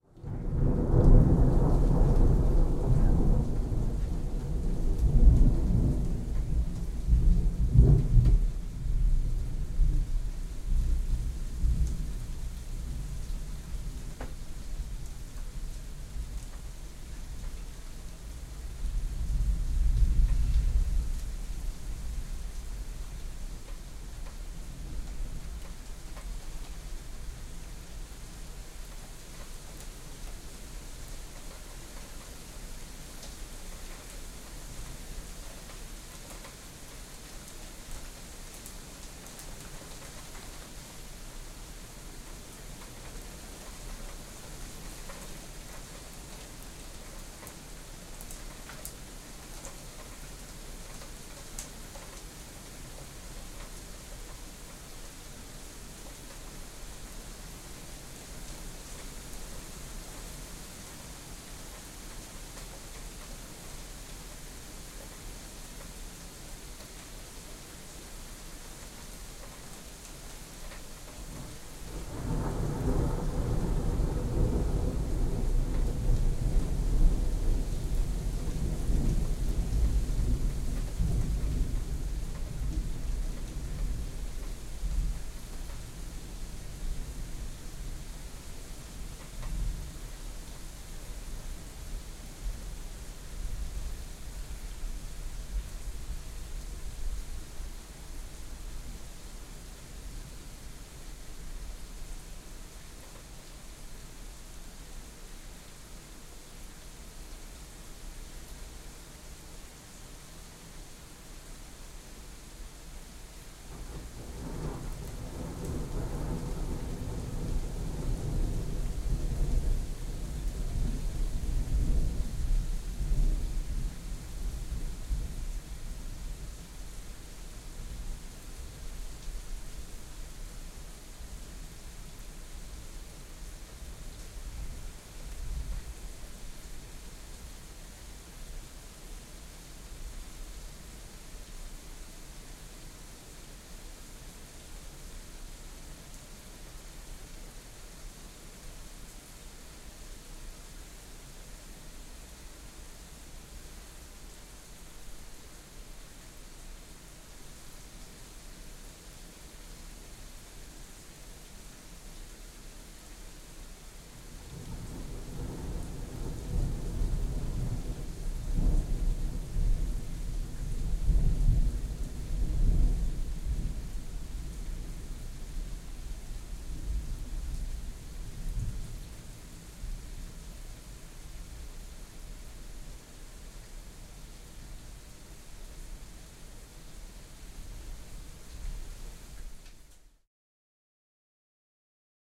Heavy Rain on an half-open window with some thunder in the background. Recorded in Germany at 12th April 2013 with the Rode NT1-A (mono)